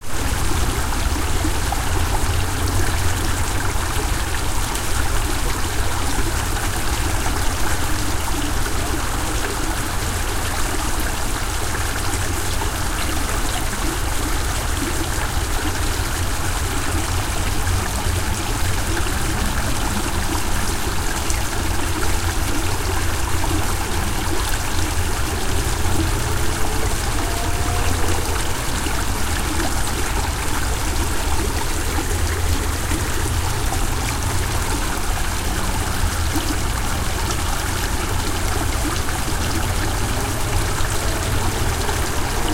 This is a recording of a small stream flowing through a gap in a low "dam". This is in a wooded area near a busy interstate highway. The recording is stereo, made using the "T" microphone that comes with the M-Audio Micro Track recorder.